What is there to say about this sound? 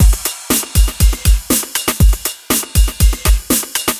A slow dnb beat @120bpm... pulse !